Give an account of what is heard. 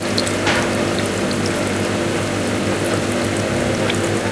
018 fountain water
This noise is a recording of the sound of a fountain for drink in a corridor from tallers from UPF campus in Barcelona.
It sounds like a motor and the runnig of the water.
It was recorded using a Zoom H4 and it was edited with a fade in and out effect.
campus-upf, noise, water